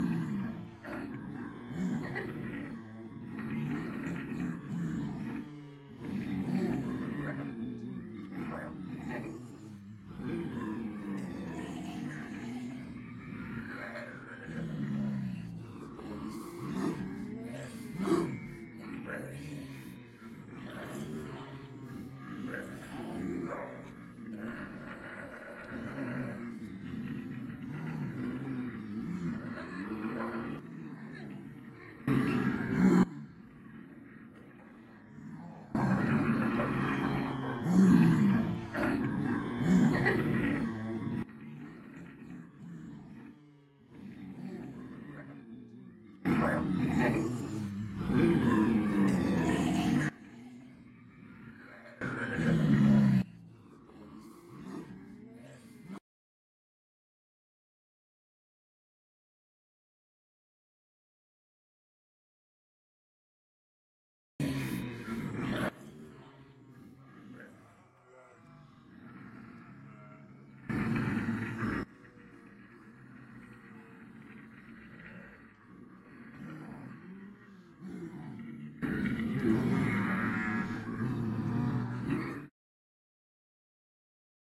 Zombie Group 8C
Multiple people pretending to be zombies, uneffected.
dead-season, ensemble, group, horror, monster, roar, snarl, solo, undead, voice, zombie